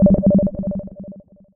Sine wave with a FM tri filter on it.